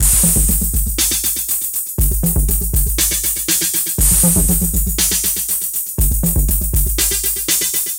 120 Metallic Beat 02
4 bar Glitch/IDM drum loop. 120 BPM.